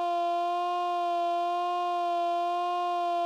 The vowel “A" ordered within a standard scale of one octave starting with root.